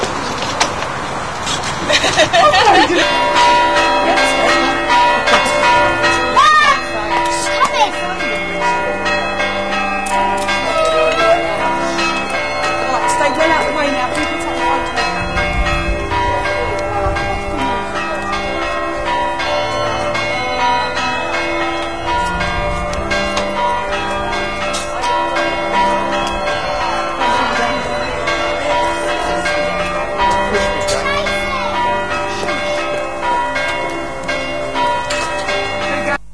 church bells from churchyard with background chatter